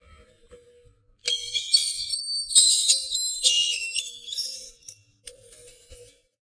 glass scratching against glass at a very strange and high pitch